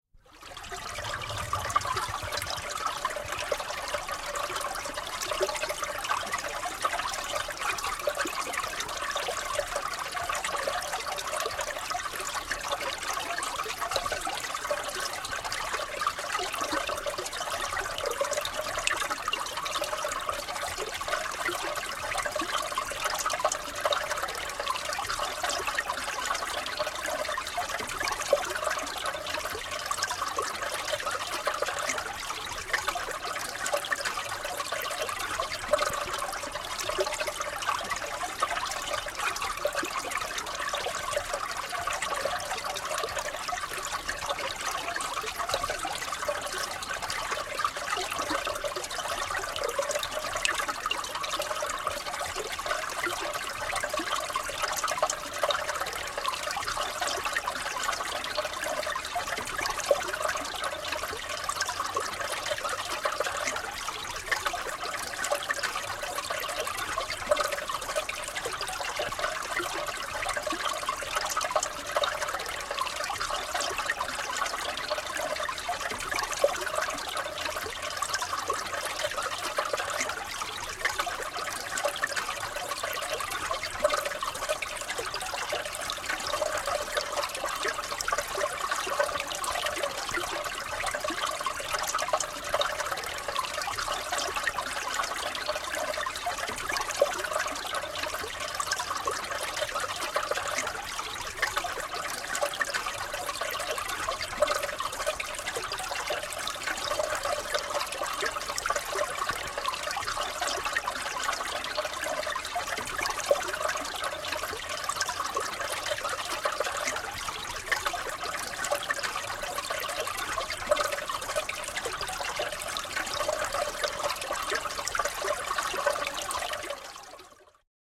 Puro lorisee ja solisee jäällä / Brook trickling in a frozen bed
Pieni puro, vesi lorisee ja solisee jäätyneessä uomassa, virtaa jään alle. Kultalan puro, jää. Sopii keväiseksi ääneksi.
Paikka/Place: Suomi / Finland / Inari
Aika/Date: 23.10.1976
Field-Recording, Finland, Ice, Luonto, Nature, Suomi, Tehosteet, Vesi, Water, Yle, Yleisradio